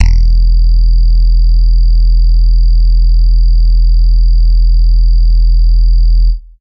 basscapes Eclettricalbsfx
a small collection of short basscapes, loopable bass-drones, sub oneshots, deep atmospheres.. suitable in audio/visual compositions in search of deepness
ambience, ambient, atmosphere, backgroung, bass, boom, creepy, dark, deep, drone, electro, experiment, film, horror, illbient, low, pad, rumble, score, soob, soundesign, soundscape, soundtrack, spooky, strange, sub, suspence, weird